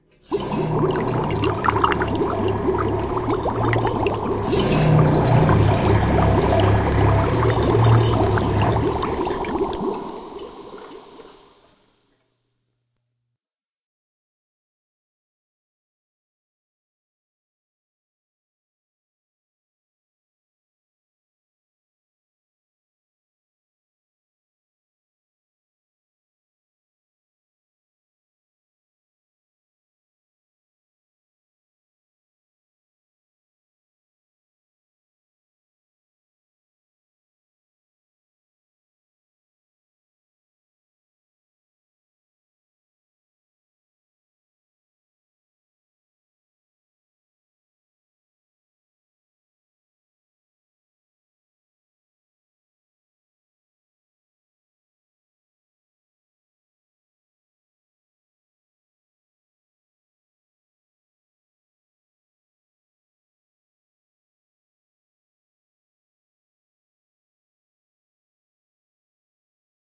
fixed the plumbing
Finally got the toilet fixed, or was that somebody's upset stomach? Based upon "WaterToilet" packby phlagasul, "RBH_Household_Drain" by RHumphries, "20060915.flush" by dobroide, and a few extra bubbles from my Roland XP-10.
flush, toilet, musical, cistern, water, plumbing, 252basics, kidstuf